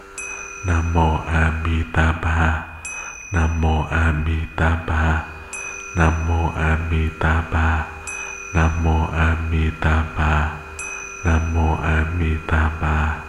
bell-short
Me chanting Namo Amitabha with bell between chants.